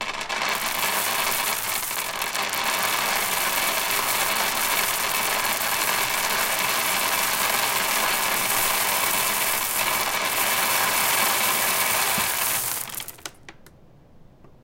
Grist Mill - Corn Down Hatch
hatch, flour, mill, grist, corn, fall, farm, industrial